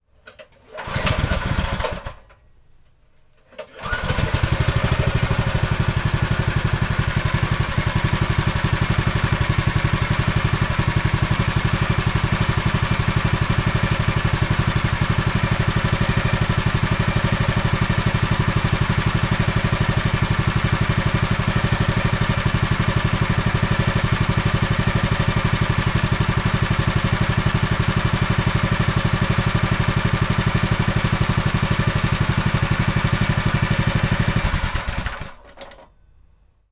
Go kart start
The 6.5HP drift 2 go-kart starts on the second pull
Go, kart, start